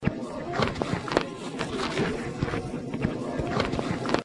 processed field-recording from a grocery store recorded here in Halifax; processed with Adobe Audition
2-bar, loop, store